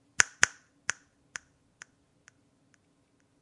snap delay 4
A single snap with a ping-pong delay on it. Have fun.